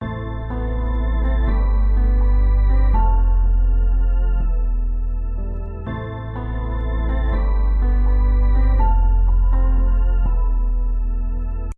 Loopy Sad 5
Needed a good sad track for my videos. I find sad a lot harder to compose than happy. Hopefully it fits, but if it doesn't work for me, maybe it will work for you!
piano, cinematic, organ, melancholic, looping, loop, sad, soundtrack, melodic, music, loops, musical